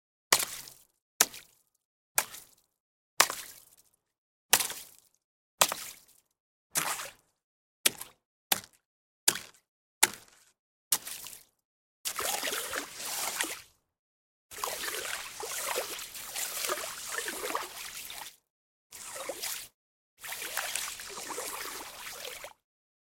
Using a stick to splash the slush on top of a frozen pond.
The samples are all cut very tightly to eliminate the ambient sound of the park. Recorded using an ME66. Thanks to Carmine McCutcheon.
ice, slush